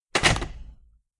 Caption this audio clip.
Door, Closing
Door closed.
If you enjoyed the sound, please STAR, COMMENT, SPREAD THE WORD!🗣 It really helps!
if one of my sounds helped your project, a comment means a lot 💙